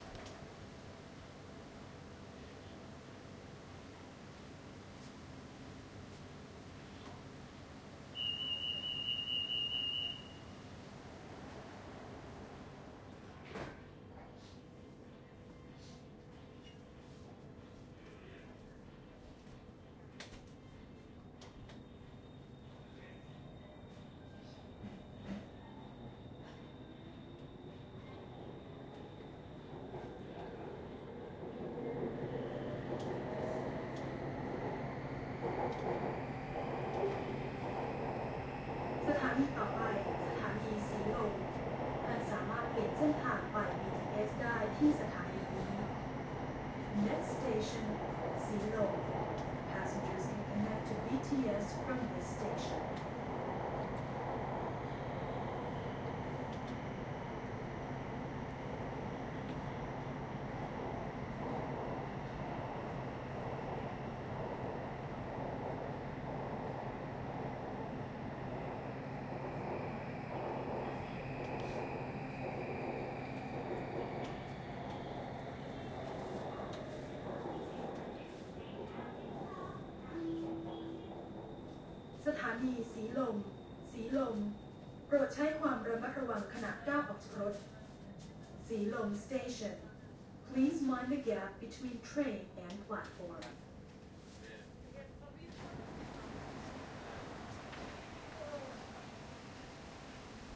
Bangkok Underground Train to Silom Station
Zoom H1 Underground Train Bangkok with announcement